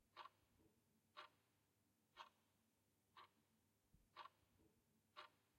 Clock Tick Tock
Recorded with a Zoom H4N in a small domestic room in stereo.
tick, interior, close, tock, stereo, clock